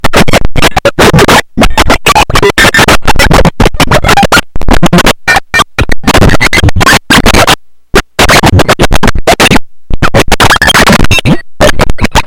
HMMM Narf
circuit-bent, coleco, core, experimental, glitch, just-plain-mental, murderbreak, rythmic-distortion